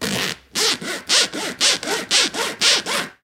Zipper HandSaw 01
zipper, saw, like, hand, big